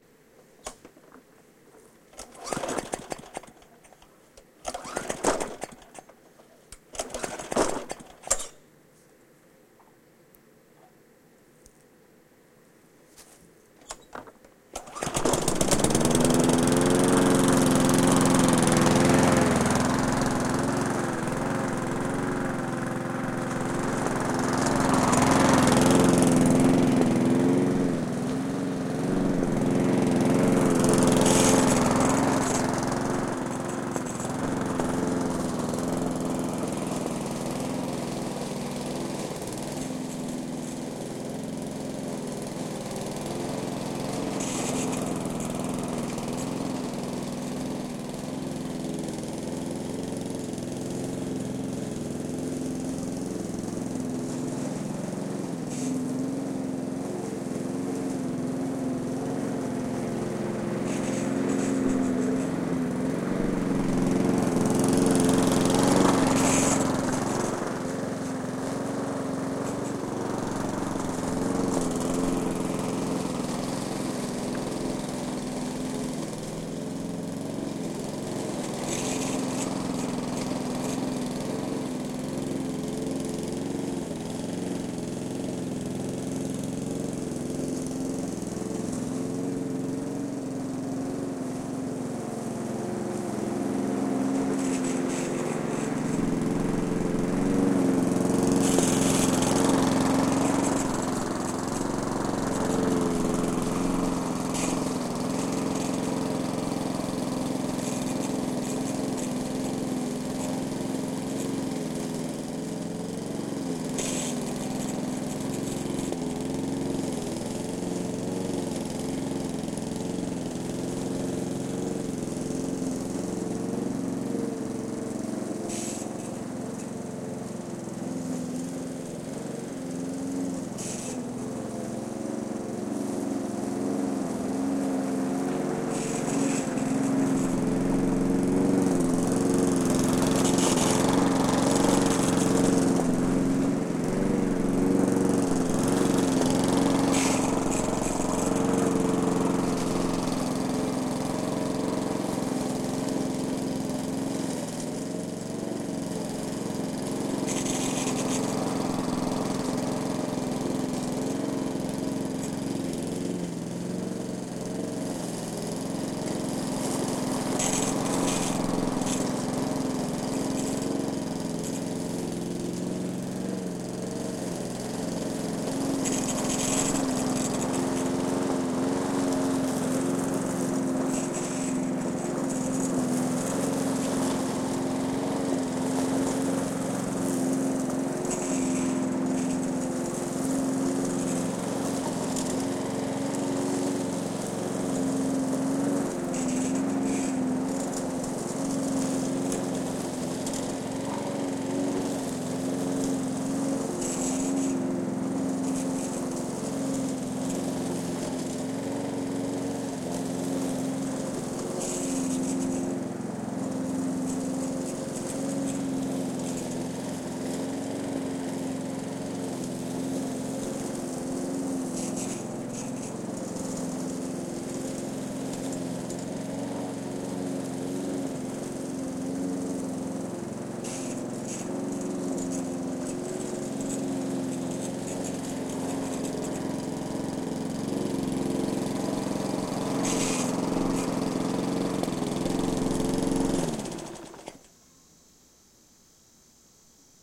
Near-distance recording of cutting the grass with a lawnmower.
grass, lawnmower